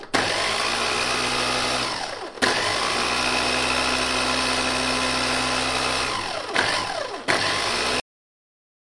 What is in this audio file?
Multi blender.Recorded with a Zoom H1.
blender, mixer, multi